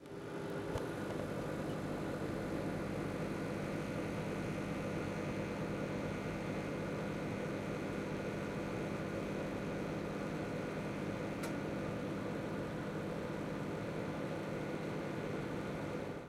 Microwaves working
This sound was recorded in Laspuña (Huesca). It was recorded with a Zoom H2 recorder. The sound consists on a microwave heating something.
Microwaves, UPF-CS12, Motor, Cooking, Machine